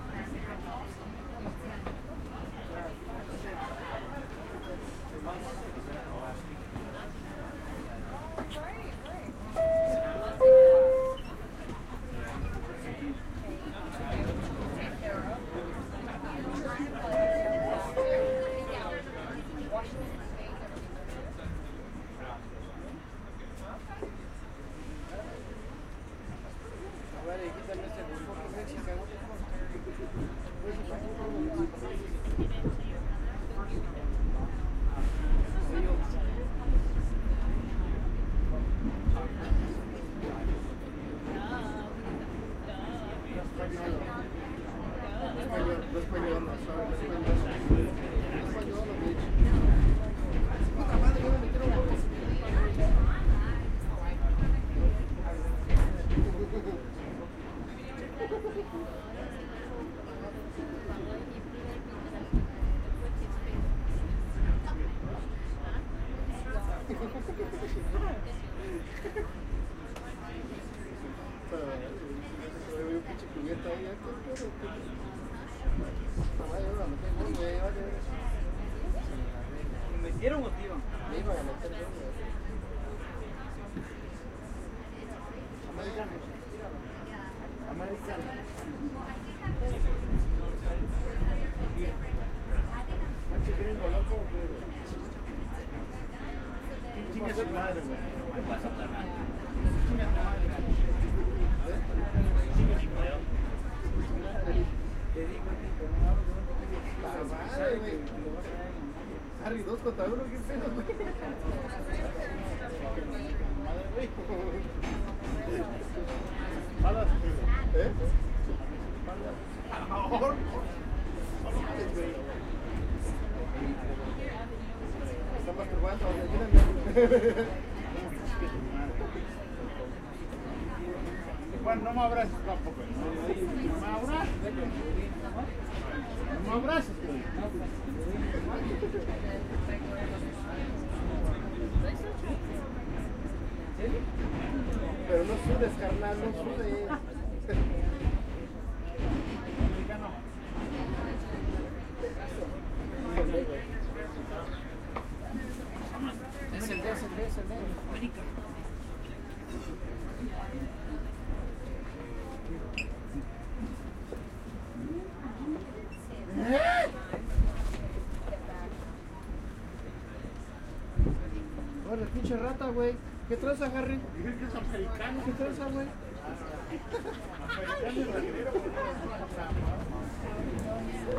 Subway Interior Chatter Amb 01
Subway interior car chatter, door closing signal